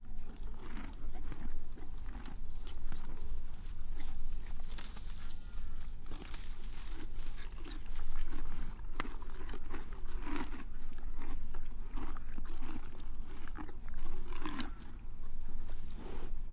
Horse Eating Grass Hay 01
I recorded a horse chewing on/eating grass. General horse noises.
Horse, chewing, eating, grass